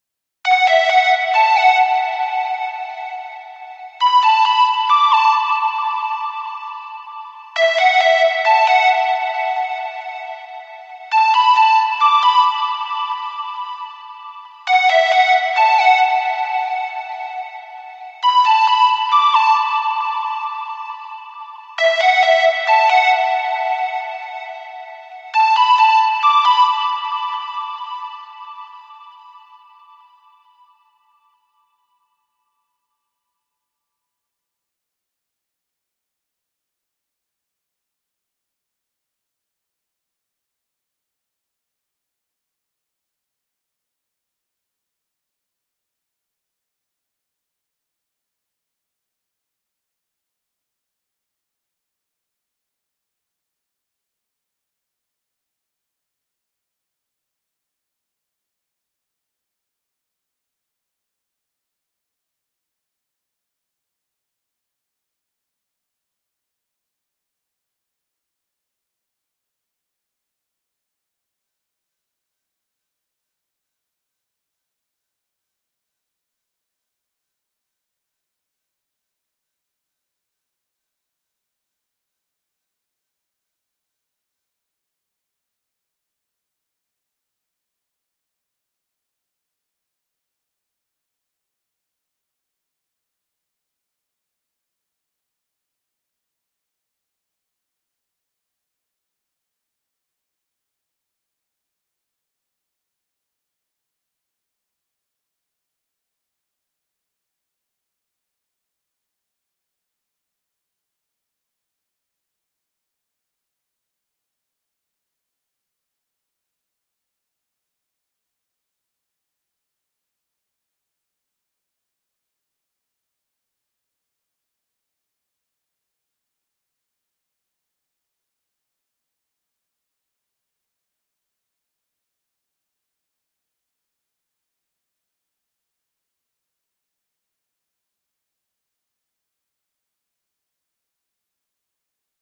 Breakdown Synth (135 BPM / A Major)
This synth part was created using Sylenth1 and third party effects and processors. The sound would be ideally suit at the breakdown section of a EDM track but could be used anywhere you like, experiment and have fun!!
135-BPM, Synth, electric-dance-music, EDM, dance, Lead, music, A-Major